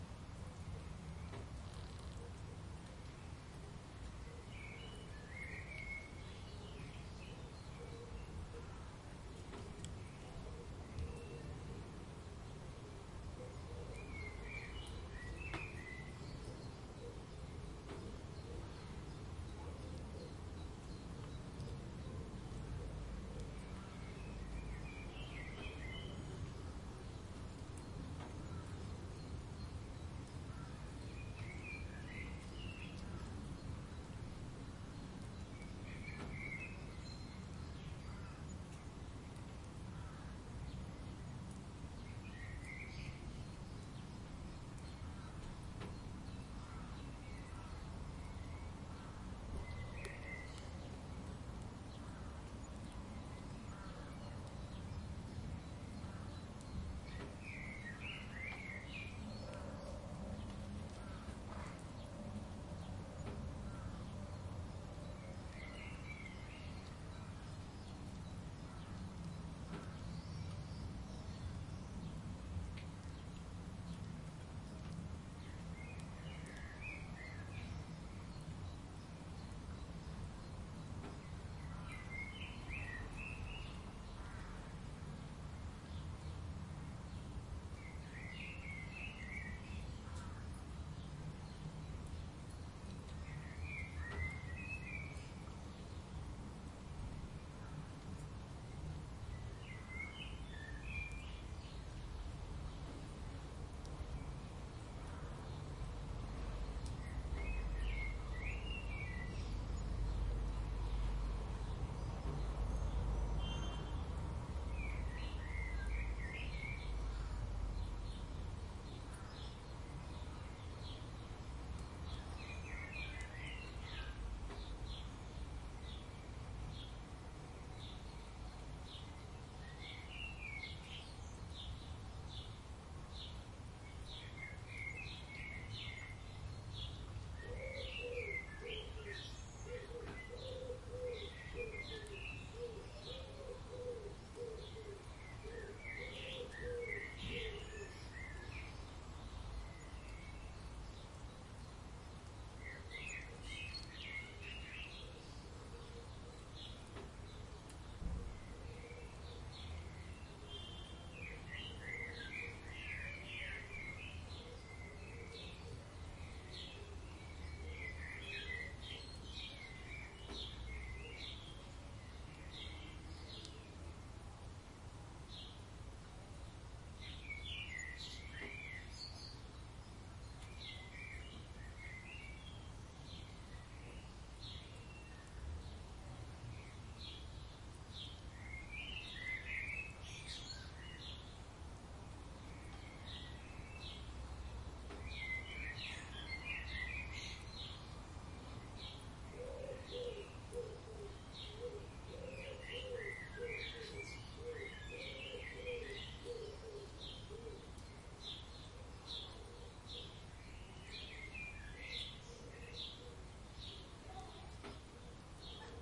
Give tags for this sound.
birds; nature; ambient; may; light-rain; town; field-recording; ambience

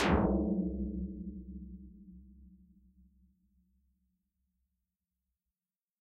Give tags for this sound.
image-to-sound; Reason; dare-26; processed; tom-drum; tom; drum

